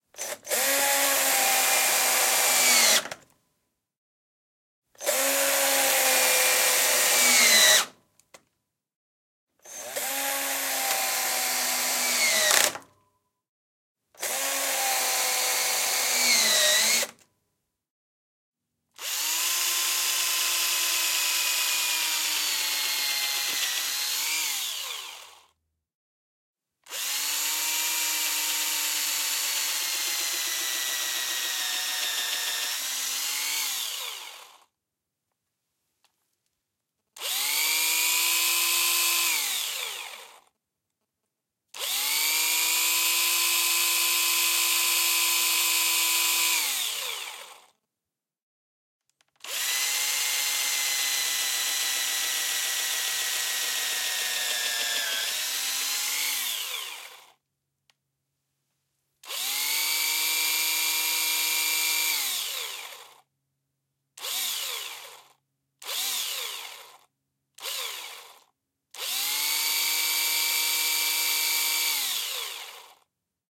Screwdriver, hand drill
Sound of a Screwdriver
builder, building, carpenter, constructing, construction, drill, drilling, hole, industrial, joiner, making, power, rotation, screw, screwdriver, tool, tools, twisting, workers